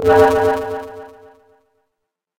Sound from phone sample pack vocoded with analogx using ufomonoA4 as the carrier. Delay added with Cool Edit.
processed, delay, voice